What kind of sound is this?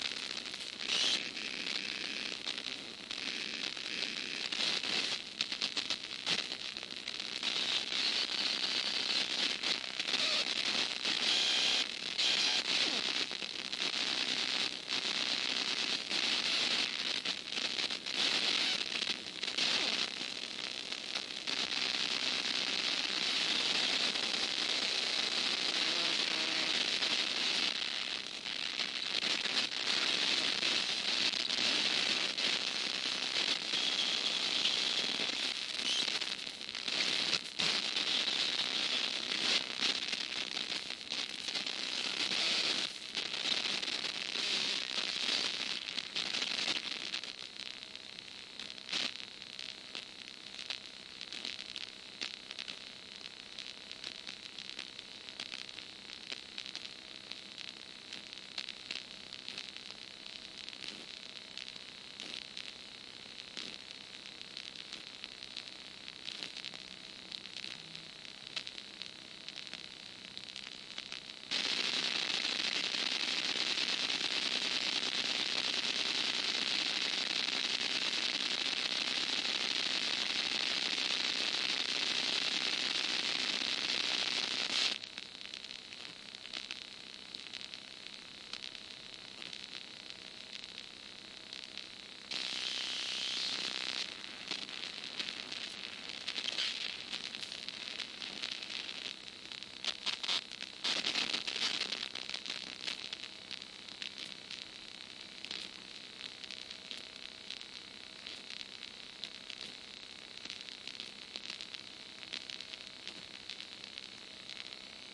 The sound of a small, wood-designed computer speaker crackling with a static-like sound.